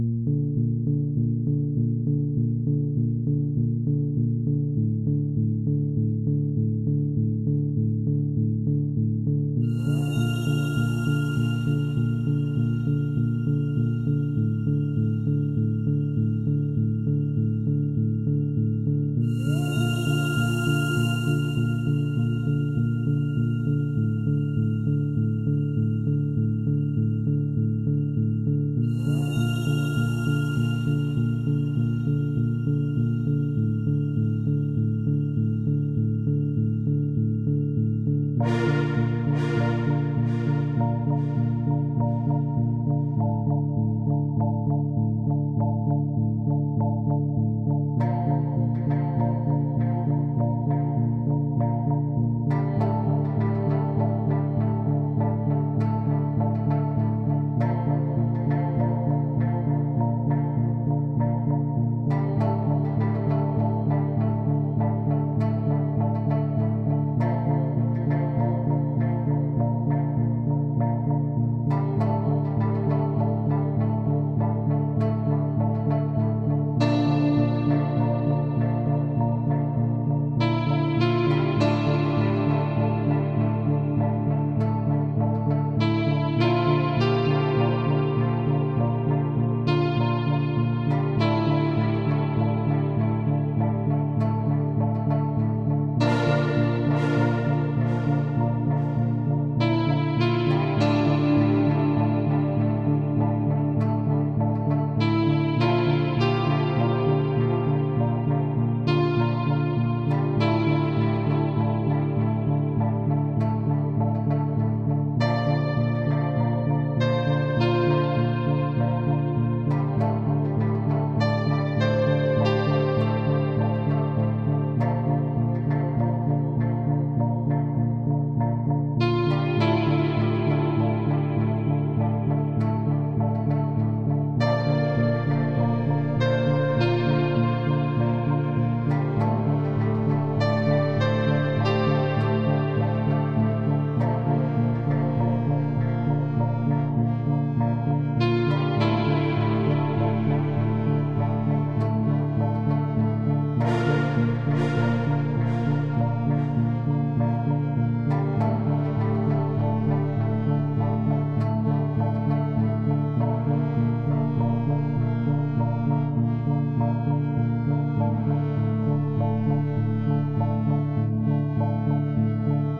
Extract of "Sweetness dark" Ambience track.
Synths:Ableton live,kontakt,Silenth1,Radium.